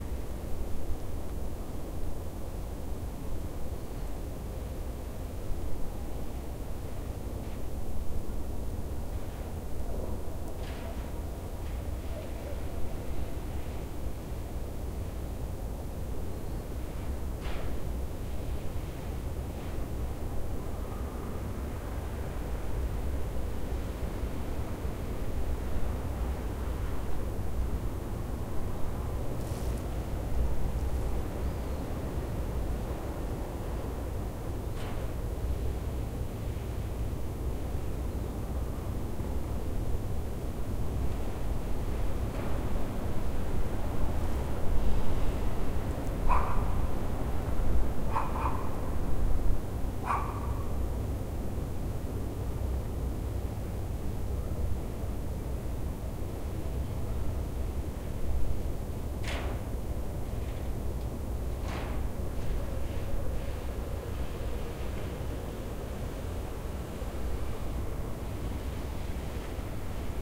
winter early morning short normalized
Winter early morning. About 5:30 am. Freshly snow. Janitor removes snow. Barking of the small dog. Voices from remote railroad crossing.
Normalized to -6db.
Recorded: 24.01.2013.
winter, echo, atmosphere, early-morning, city, morning, noise, bark, town, atmospheric